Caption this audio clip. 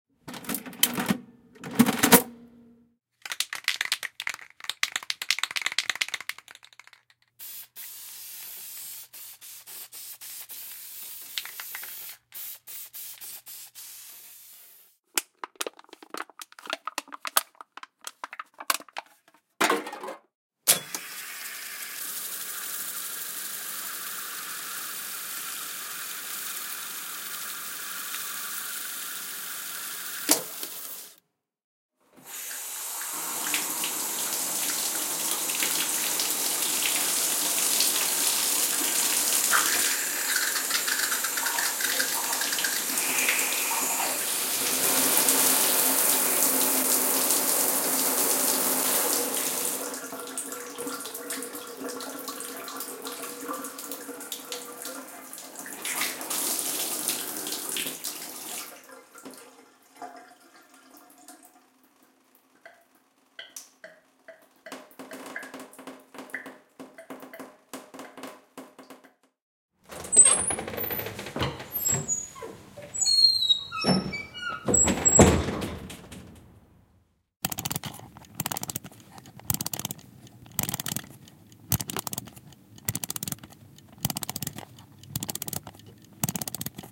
Mgreel - crank spray paint alum can reel to reel shower door winding clock mgreel
Another collection of carefully recorded sounds for the Morphagene.
1. Crank
2. Spray Paint
3. Alumunium Can
4. Reel ro Reel(rewinding)
5. Shower(interior recording)
5. Squeaky Metal Door in an empty room
6. Winding up an old clock
aerosol, aluminum, bathroom, can, clock, crank, door, mgreel, Morphagene, paint, reel, shower, spray, spraycan, squeaky, water, winding